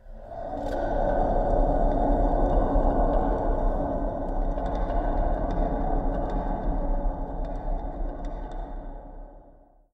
My peers and I recorded this in the Learning Audio Booth. We used a plastic hamster wheel and spun it into the mic. We edited the recording separately and mine came out eerie and atmospheric.